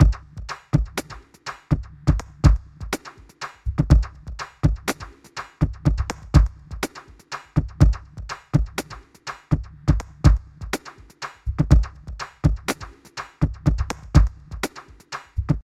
8 - Bar Beat
Reason, Man, 8, Beat, 123bpm, Milk, Drum, Bar